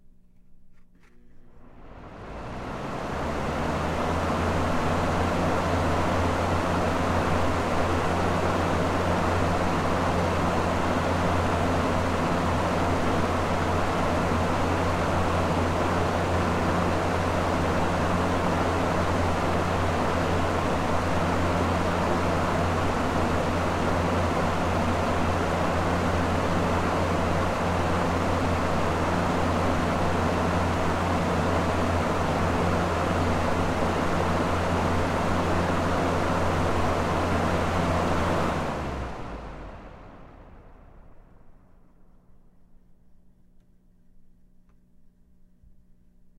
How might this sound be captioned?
Box fan turning on, running and then shutting off.
fan, home, window-fan